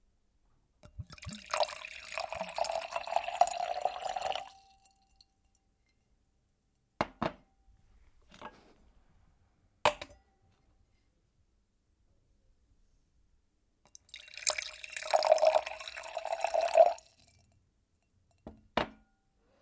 wine/water pouring into a glass
bottle, glass, wine